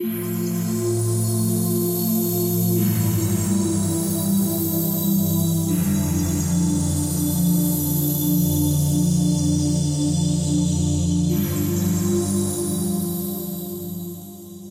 Live - Space Pad 03
Live Krystal Cosmic Pads
Live, Pads